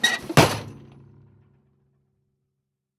Tool-case - Drawer open tight squeak
A drawer pulled open and it squeaks.